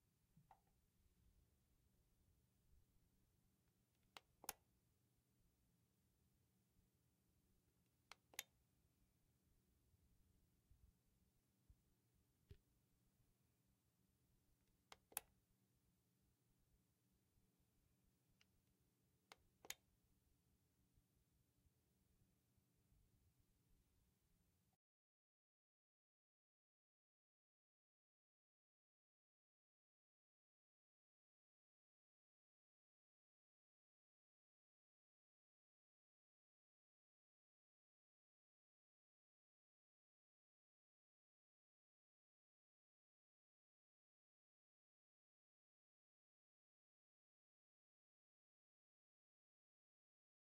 untitled light clicker
field-recording, clicker